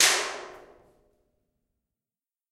One of a series of sounds recorded in the observatory on the isle of Erraid